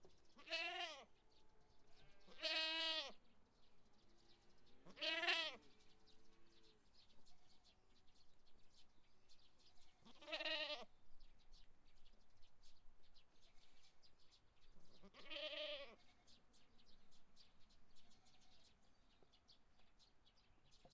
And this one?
Gout, Korea, Sound
Gout, Korea, Sound